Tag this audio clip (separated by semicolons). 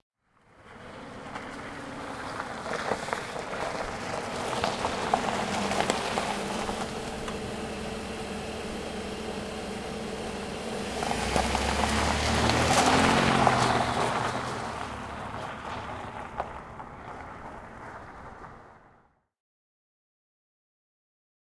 arrive; gravel; leave; van